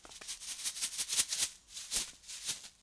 Shaking a bag of rice
random, thumps